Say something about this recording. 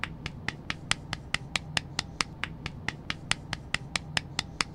The sound was created by smacking a harmonica against the palm of a hand. The sound was amplified in Pro Tools.